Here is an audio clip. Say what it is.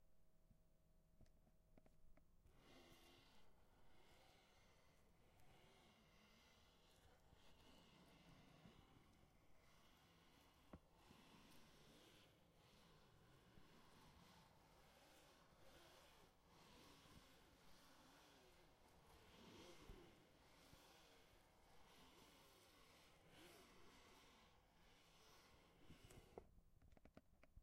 STE-005 eraser
eraser is wiping the pencil line on the paper
paper, pencil, wipe